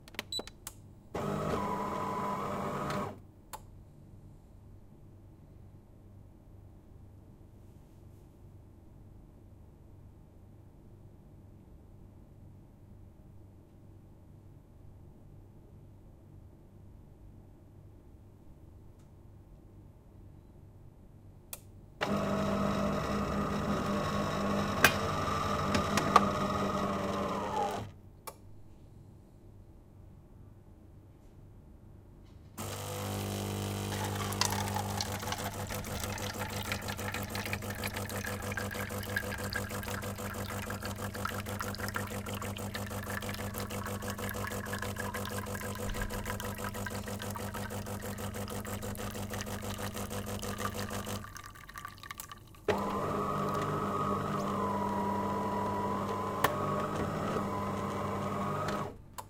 coffeMachine starting withHeating
Switchig on coffee machine with hearting. This is DeLonghi coffee machine.
XY-Stereo.
noise, coffee, coffee-machine